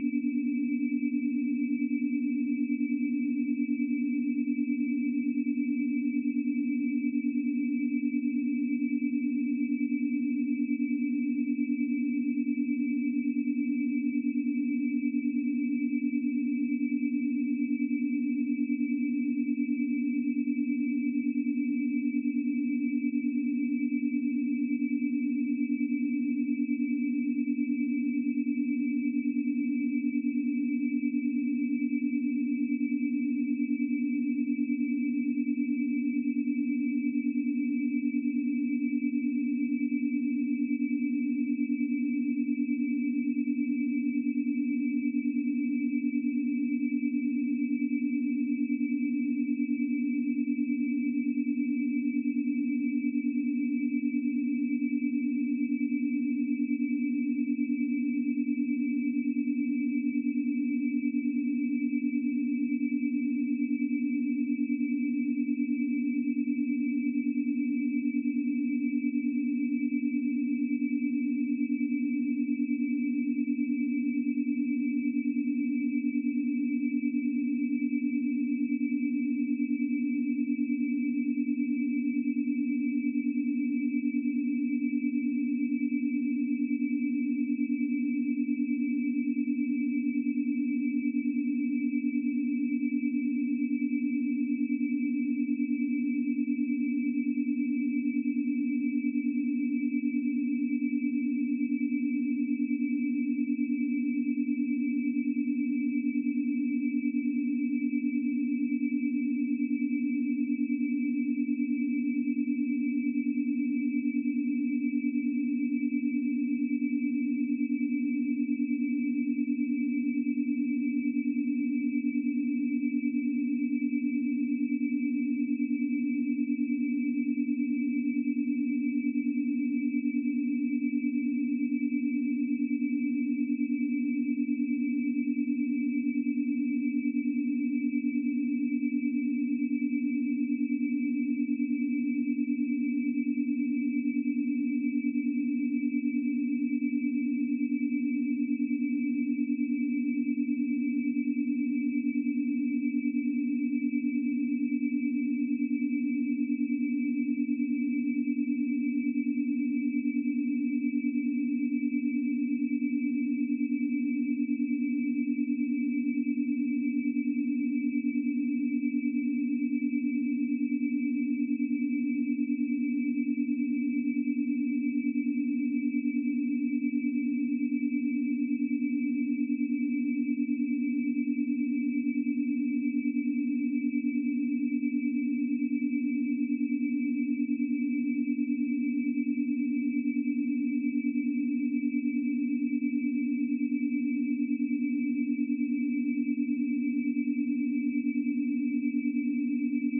Imperfect Loops 18 (pythagorean tuning)
Cool Loop made with our BeeOne software.
For Attributon use: "made with HSE BeeOne"
Request more specific loops (PM or e-mail)
background, sweet, loop, electronic, ambient, experimental, pythagorean